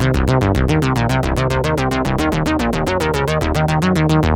vintage synth 01-03-03 110 bpm
some loop with a vintage synth